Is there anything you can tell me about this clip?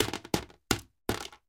delphis ICE DICES LOOP #163
PLAY WITH ICE DICES SHAKE IN A STORAGE BIN! RECORD WITH THE STUDIO PROJECTS MICROPHONES S4 INTO STEINBERG CUBASE 4.1 EDITING WITH WAVELAB 6.1... NO EFFECTS WHERE USED. ...SOUNDCARD MOTU TRAVELER...